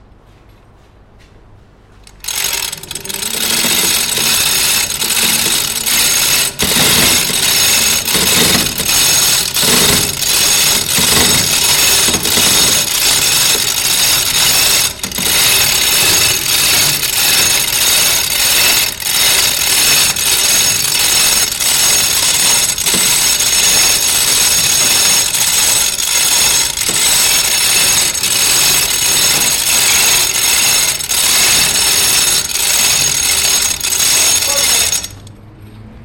pulling shutter door's chain recorded by a sennheiser k6 boom connected to Zoom H4N recorder.